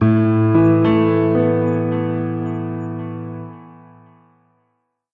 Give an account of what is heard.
Firm opening phrase, part of Piano moods pack.